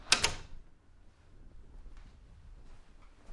The back door on my house shutting from the inside.